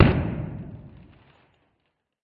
a systematic series: I´ve recorded the pop of a special packaging material several times with different mic settings. Then I decreased the speed of the recordings to 1/2, 1/4, 1/8 and 1/16 reaching astonishing blasting effects. An additional surprising result was the sound of the crumpling of the material which sound like a collapsing brickwall in the slower modes and the natural reverb changes from small room to big hall